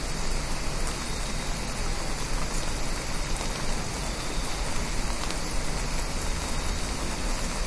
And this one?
Some light rain and crickets. Loops seamlessly. Recorded with a Roland Edirol R-09HR and edited in Adobe Audition.
rainy, ambient, life, nature, drizzle, crickets, light, weather, rain, shower, rainfall